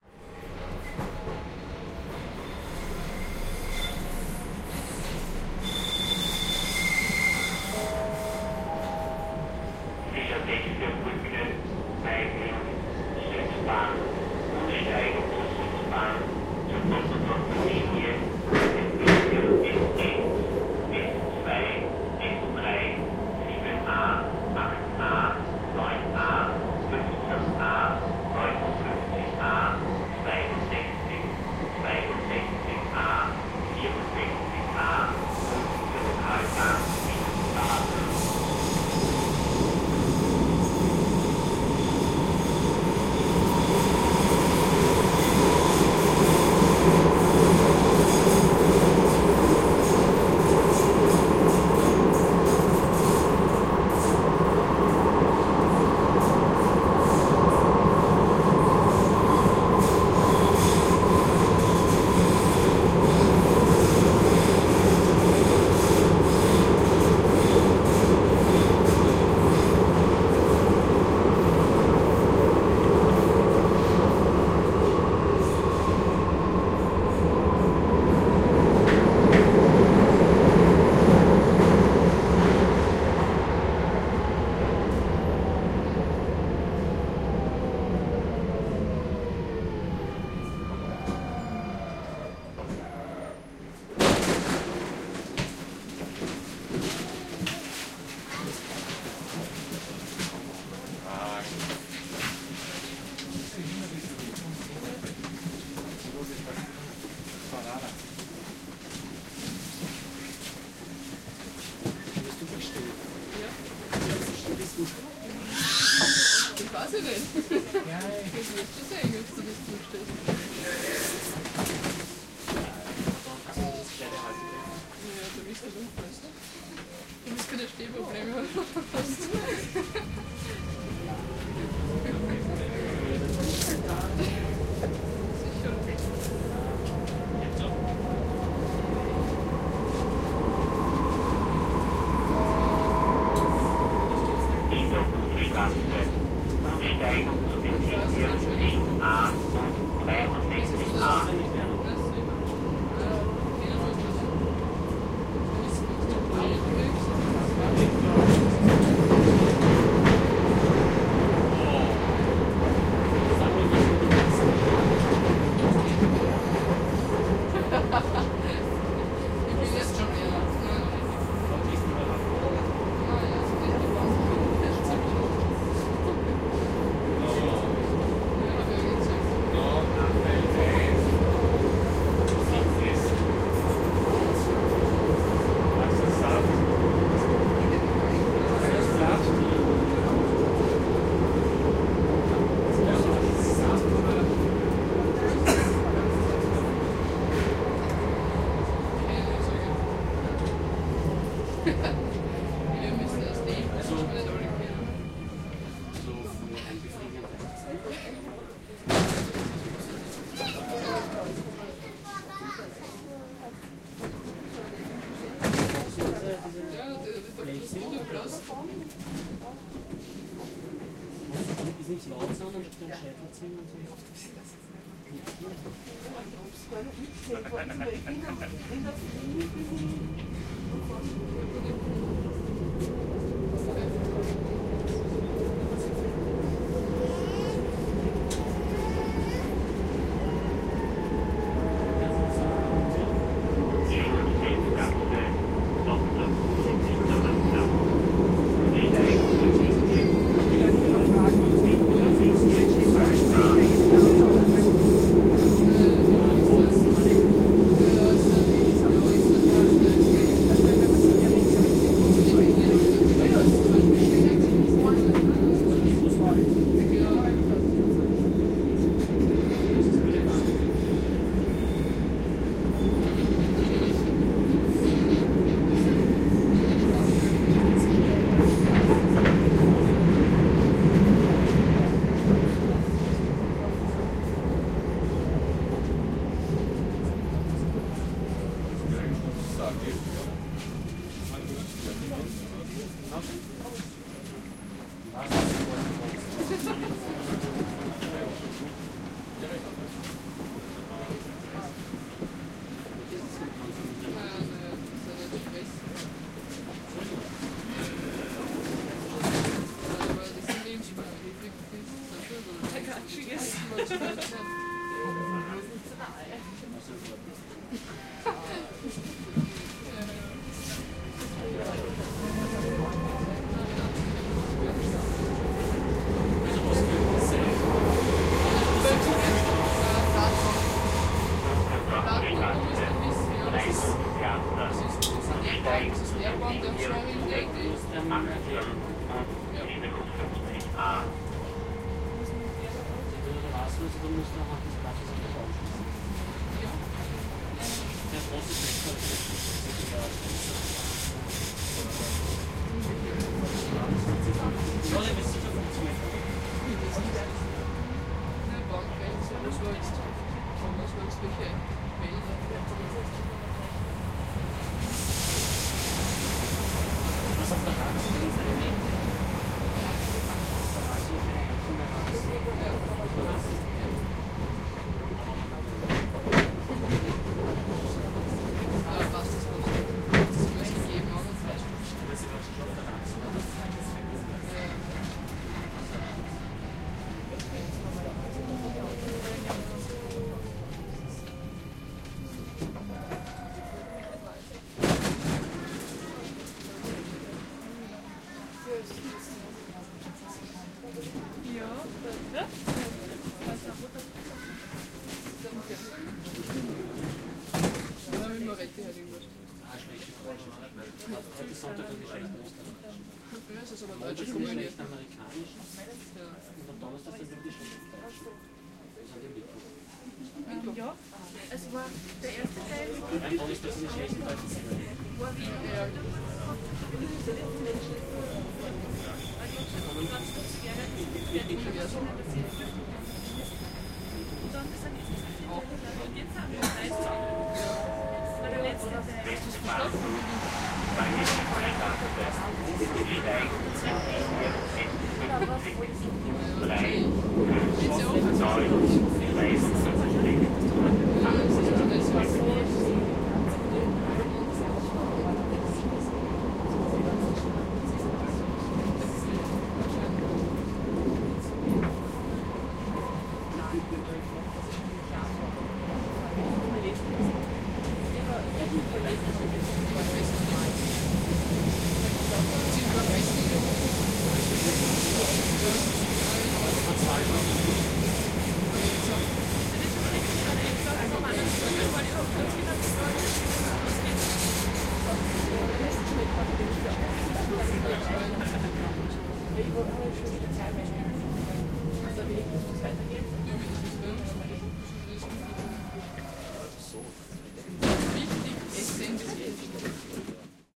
Inside a crowded subway (metro) in Vienna, Austria
Inside a crowded subway (metro) train in Vienna, Austria. Route from "U6 Meidling" station to "U6 Westbahnhof" station. Several stops with announcements, train ambience, people talking. XY recording with Tascam DAT 1998, Vienna, Austria